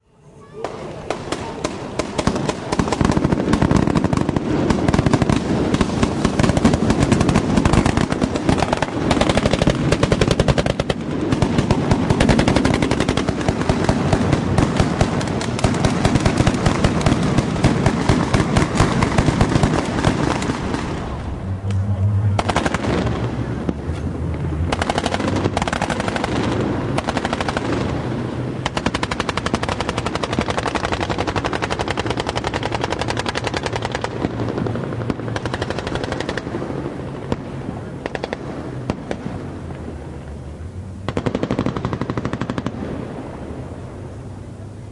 Army Special Forces armored personnel carrier participating in an operations demonstration in downtown Tampa, May 2012.